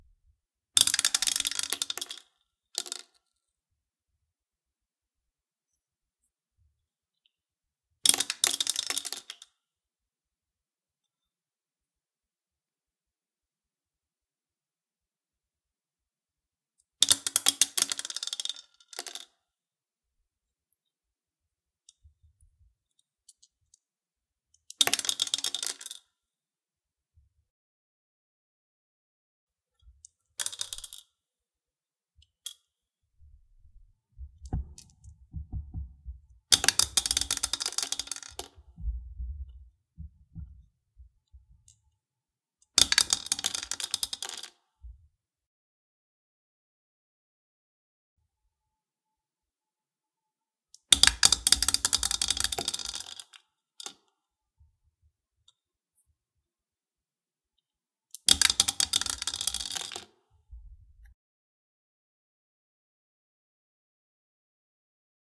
$ DICE - multiple rolls
Rolled a couple of dice in my upstairs bathroom's ceramic sink. Nice reflections, depending on your scene.
ceramic, dice, roll